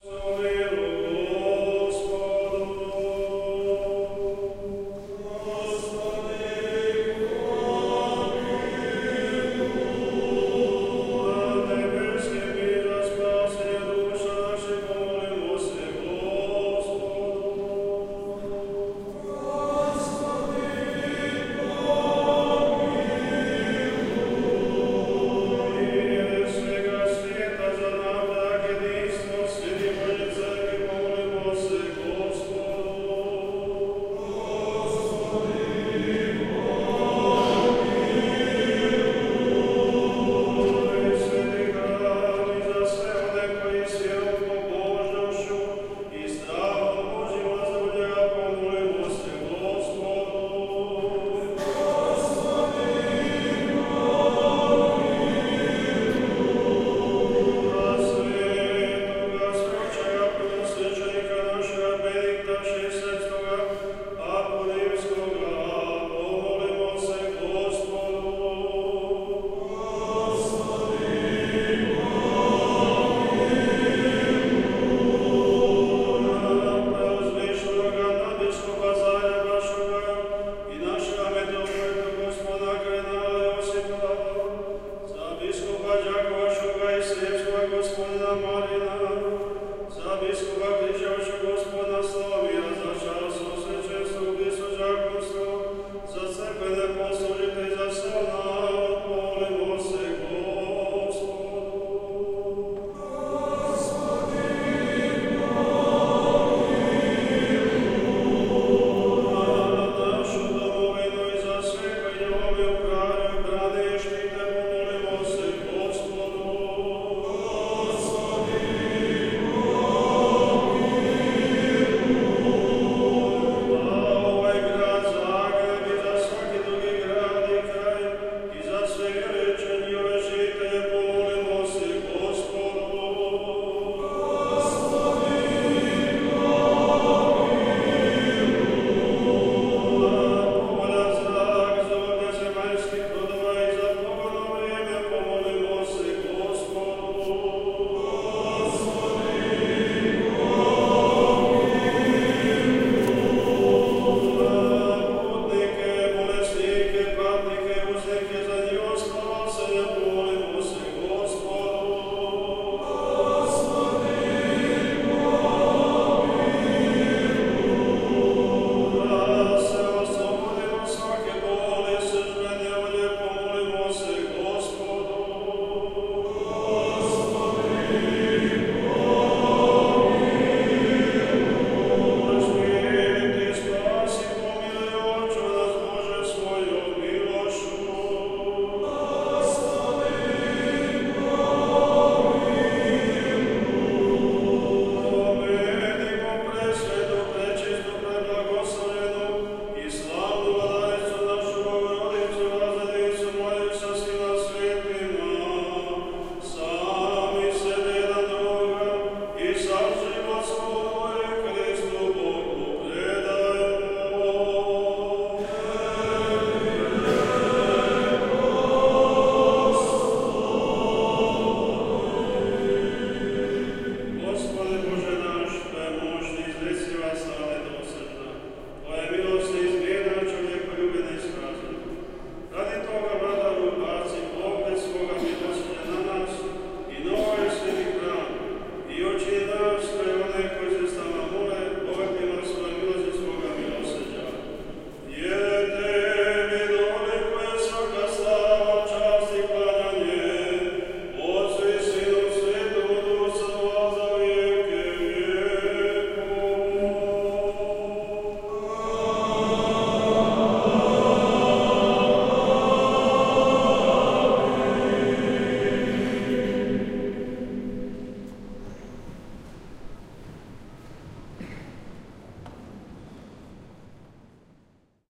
mass in croatian cathedral
I stumbled upon a very crowded mass in a large Gothic cathedral in Zagreb, Croatia. The leader is heard through church's sound system, the crowd is chanting live. Even if you are not Catholic it is overwhelming.
church, acoustic, field-recording, religion, prayer, mass, massive, cathedral